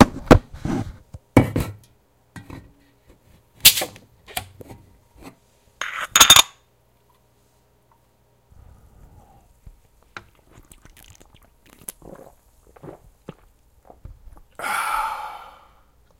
Opening Monster Mega Energy Drink (No Narration)
The sound of me opening a Monster Mega Energy Drink with no narration
Sound-Effects, Energy-Drinks, Soft-Drinks, Monster-Energy, Mega, Monster, Soda, Softdrink, Recorded